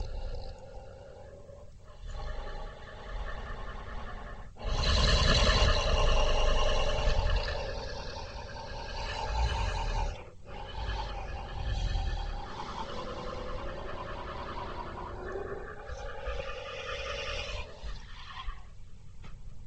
Creature like a werewolf breathing/growling